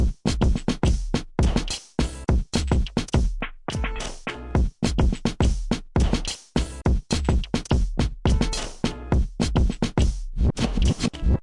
simpel old school drum loop
created with an op - 1 and processed in Logic pro